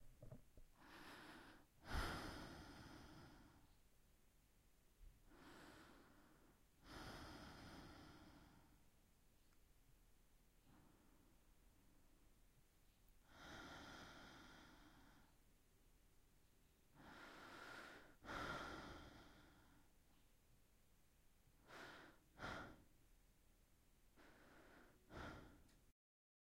Sigh (female voice)
A female voice sighing at different speeds. Recorded using a Zoom H6 and an XY capsule.
Female, OWI, Sigh